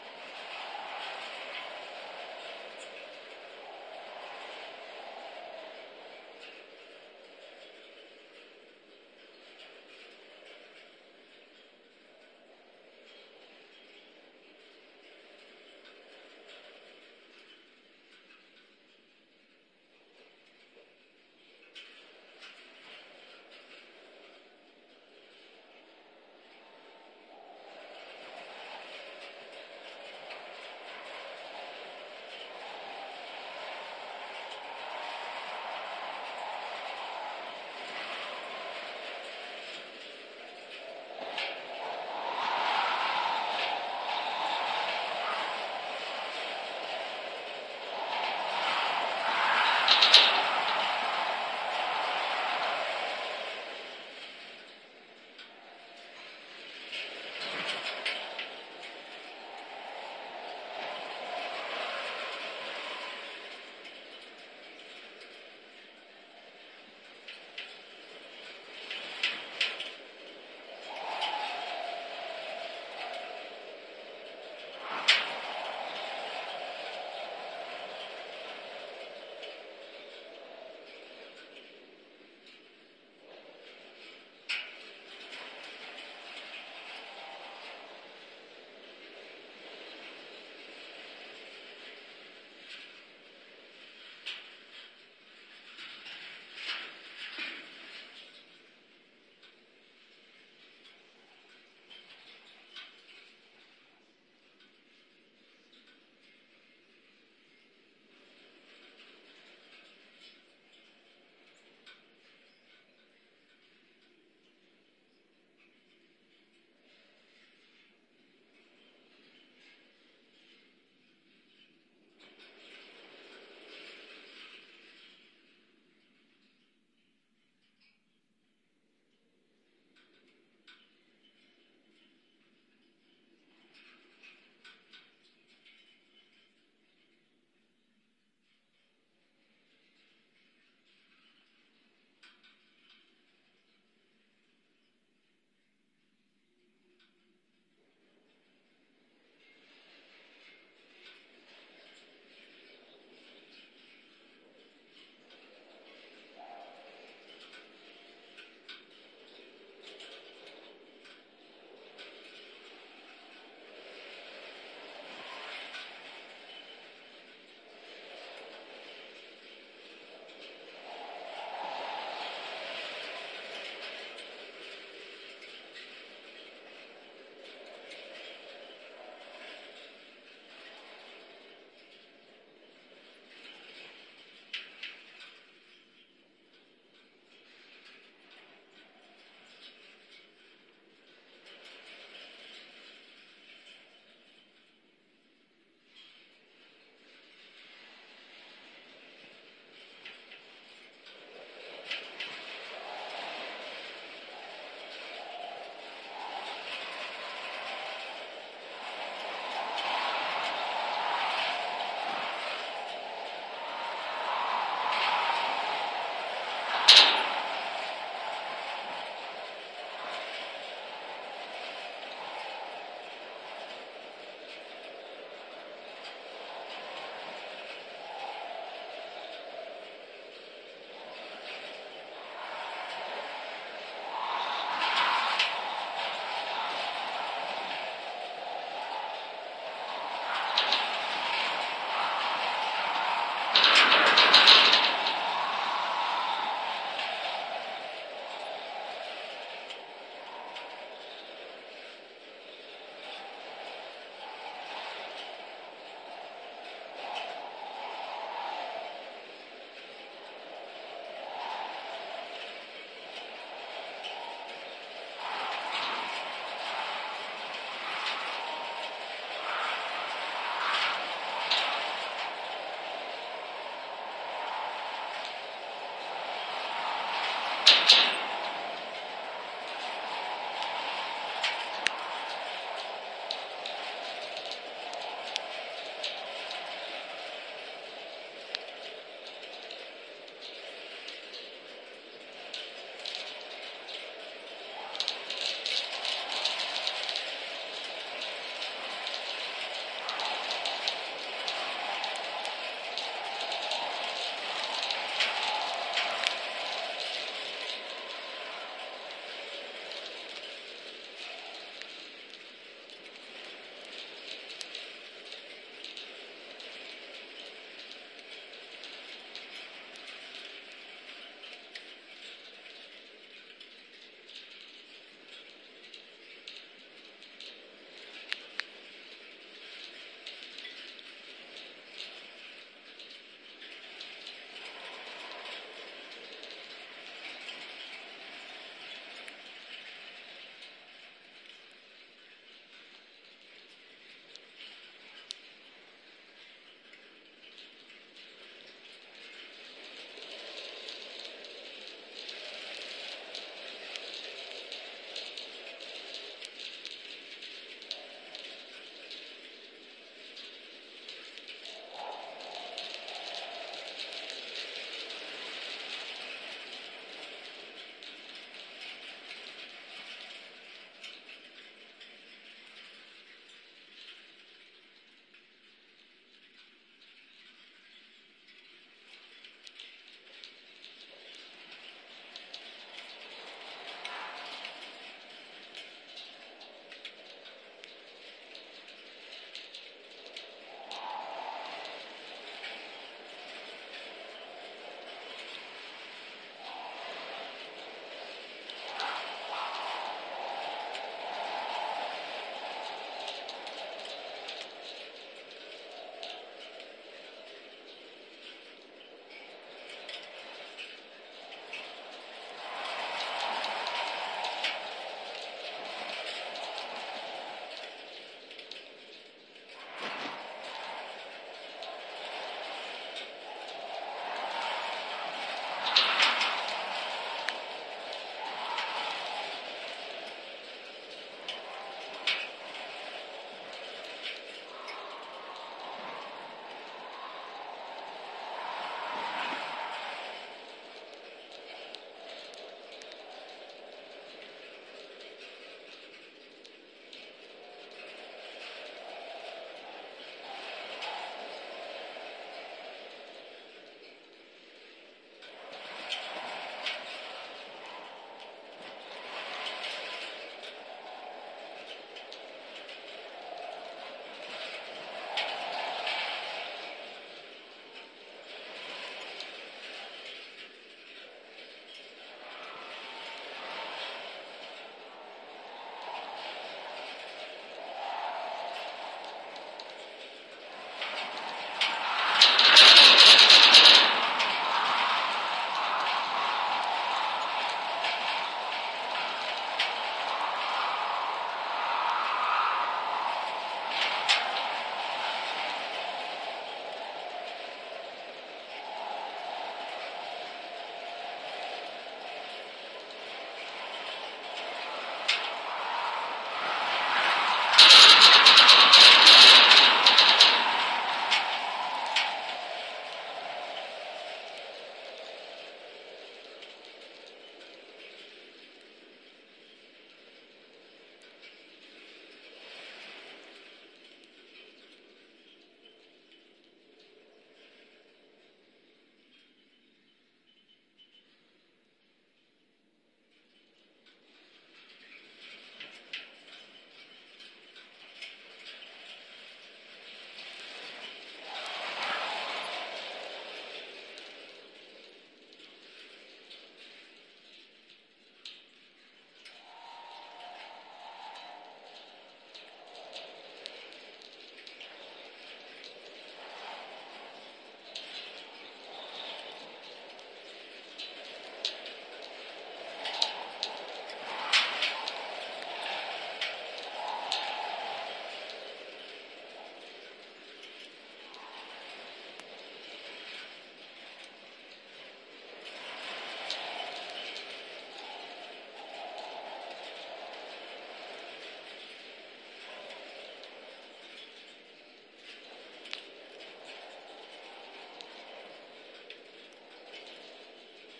110518 03 wire glen baeg
Long version of a recording of wind catching a wire fence at the top of a hill in Scotland, recorded with contact mics & Sony PCM-M10. Some clipping at times unfortunately.
contact-mic, field-recording, metal, spooky, unprocessed, wind, wire